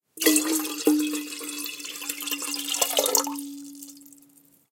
I recorded different types of pouring sounds for a project. This one was too metallic for my purposes.